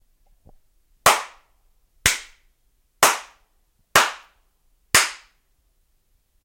Hand claps
Loud, offbeat claps
hand, hands, clapping, claps, clap